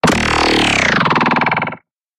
Drilling down, good for shucking moment
drill, drilling, horror